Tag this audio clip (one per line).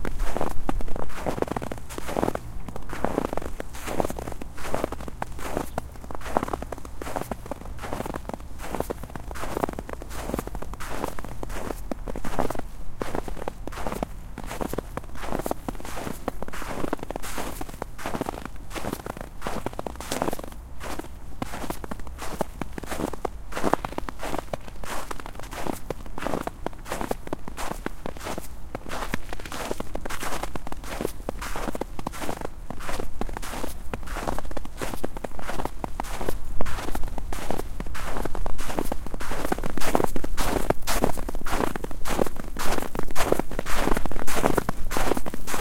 SFX
effect
step
foot
sound
walk
recording
FX
footstep
snow